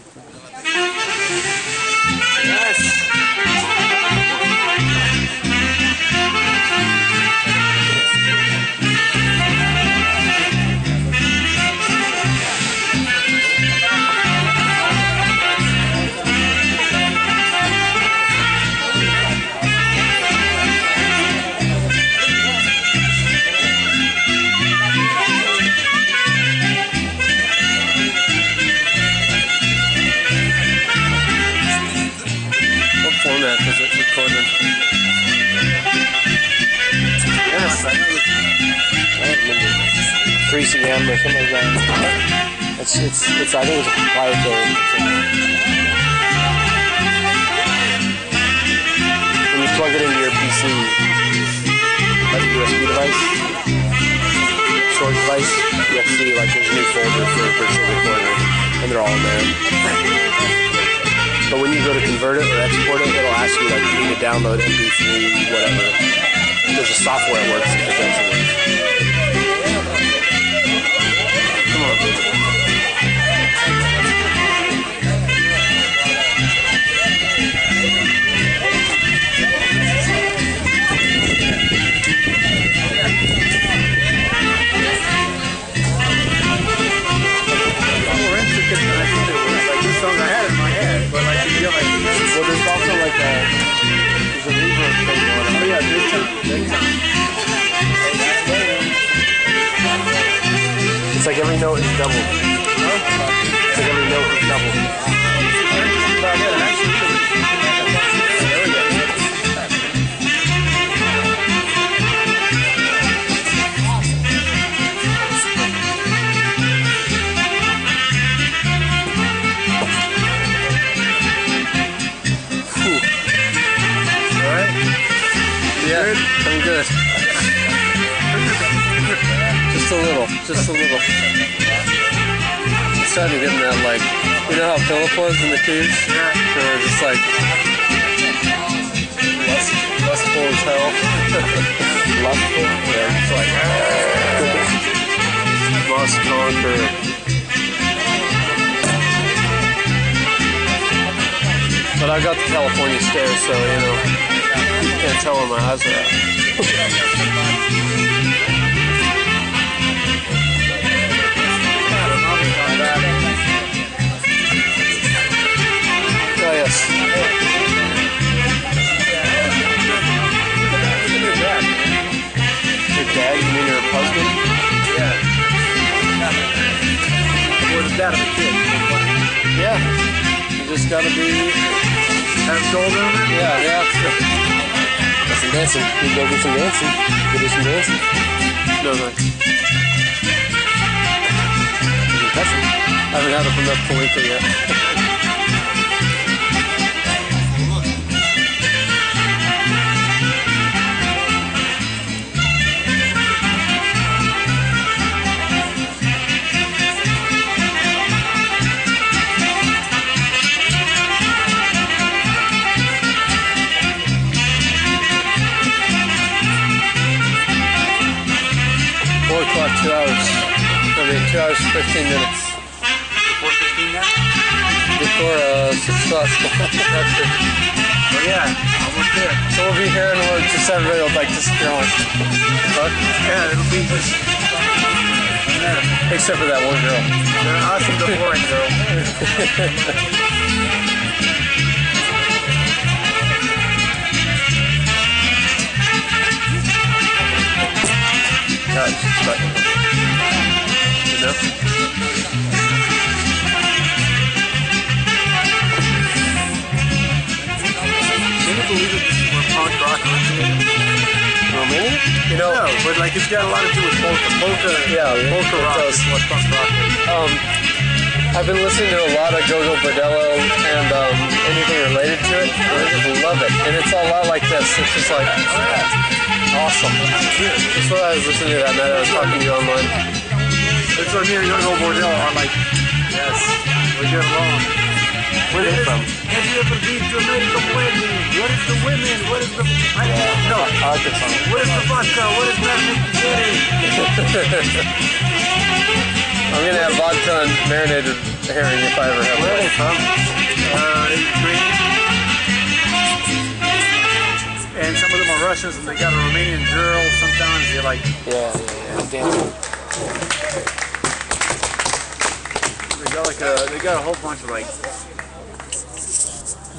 Saxophone plays at a Romanian spring festival
Recorded at a Romanian Spring Festival in Lilburn, Georgia.